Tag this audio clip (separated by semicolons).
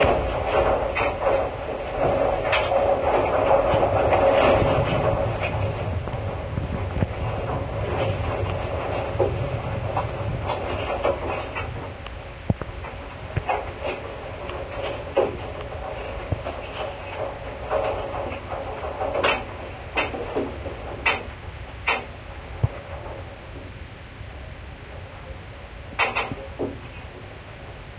recording; scaffolding; metal; mic; field; contact